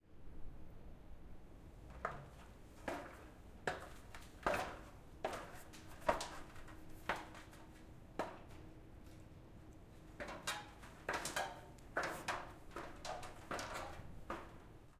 Climbing ladder
Recorded with a zoom H6. Climbing a ladder and going down a ladder.
ladder, down, up, OWI, climbing